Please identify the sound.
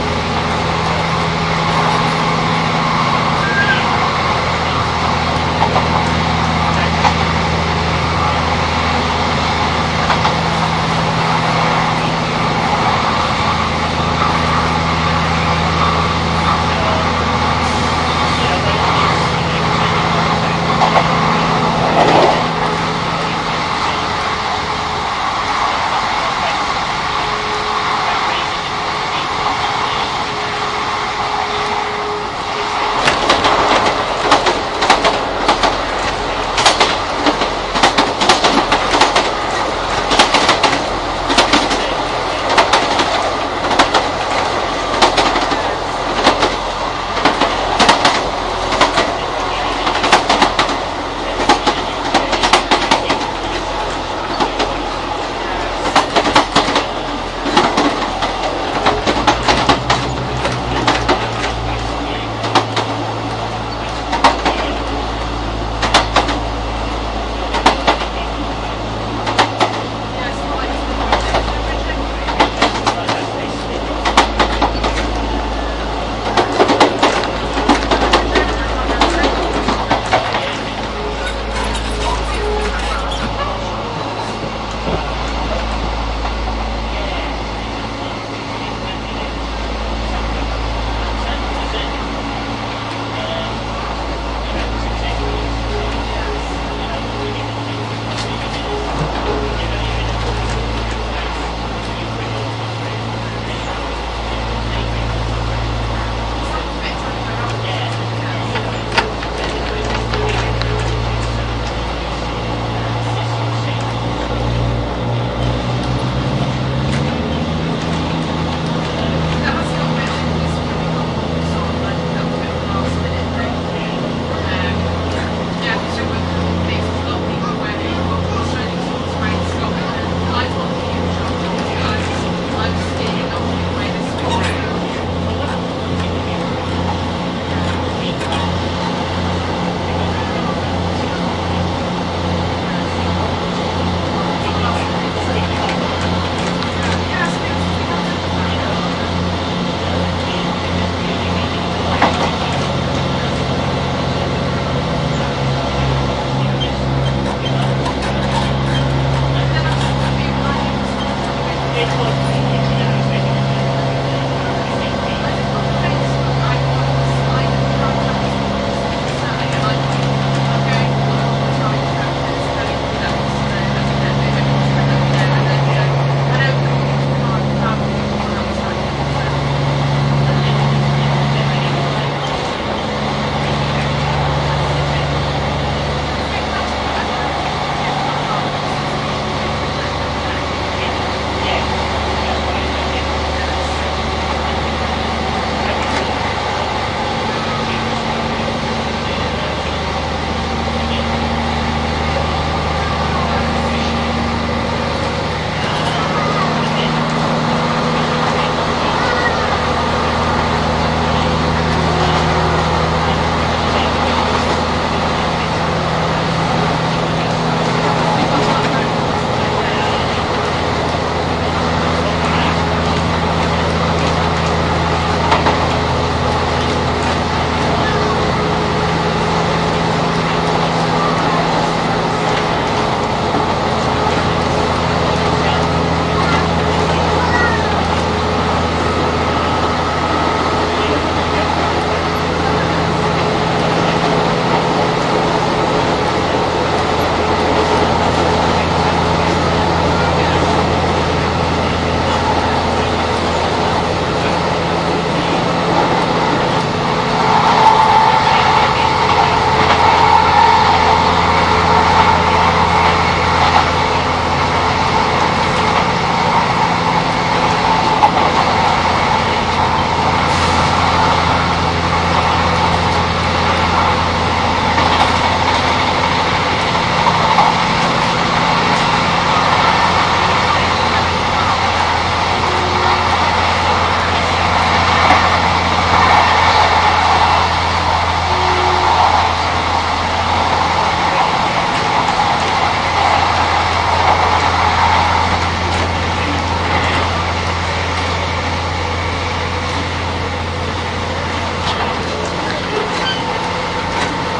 TRAIN CARRIAGE INTERSECTION
Field recording at the intersection of two carriages on a very busy train travelling across the South West.